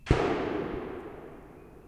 Distant gunfire 03
Distant gunfire. Not suitable for close-range shots, but could work as well as distant shots or even explosions.
blast, boom, bullet, crack, distant, fire, gun, gunfire, pow, shoot